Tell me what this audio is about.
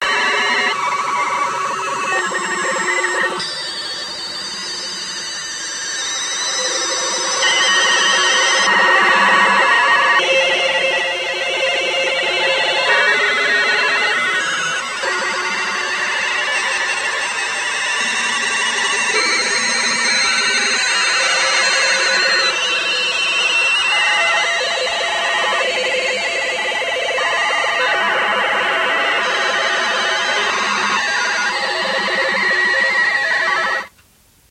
sw sounds 3
Different data transmission sounds in a shortwave radio band. Recorded from an old Sony FM/MW/LW/SW radio reciever into a 4th-gen iPod touch around Feb 2015.